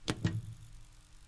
this sound is made using something in my kitchen, one way or another
percussion, hit